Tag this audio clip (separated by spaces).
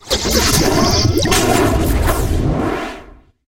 background scary atmosphere stinger destruction abstract impact